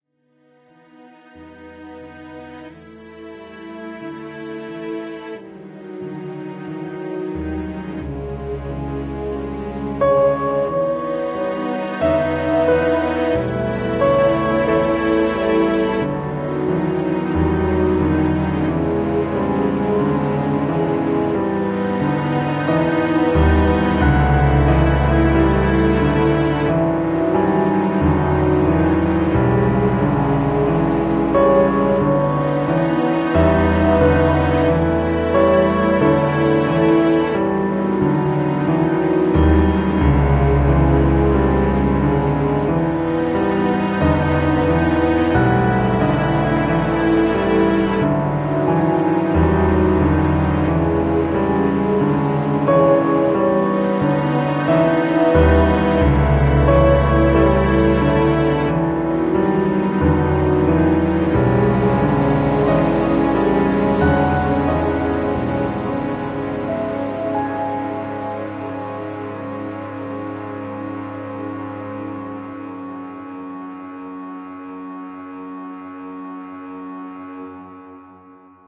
Emotional Lil Orchestra
A emotional piano + strings sound.
You can check also some of the sounds I used here separately in the pack. Hope you like it ;))